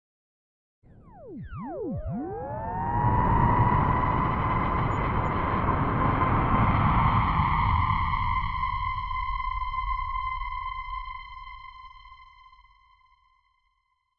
Made with the Hybrid morph synthe in Logic pro Jam pack with a start ringtone effect.